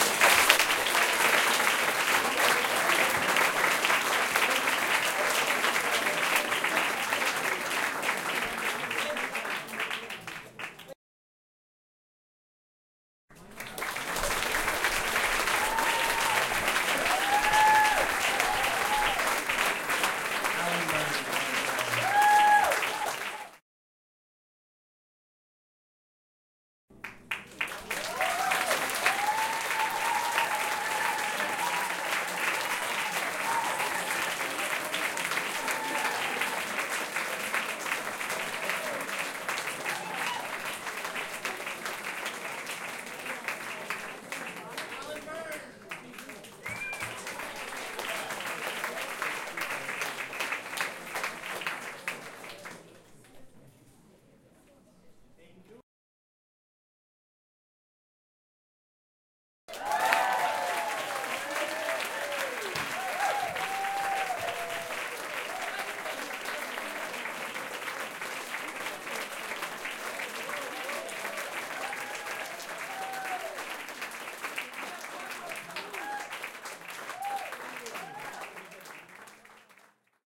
applause medium int small room intimate house show
applause, house, int, intimate, medium, room, show, small